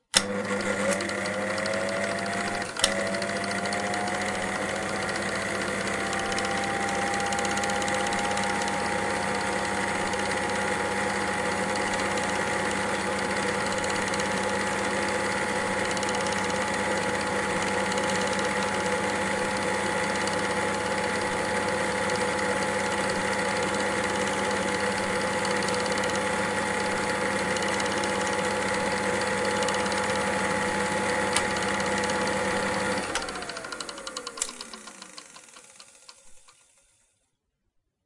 Bolex 8mm Projector
bolex, paillard, film, projector, 8mm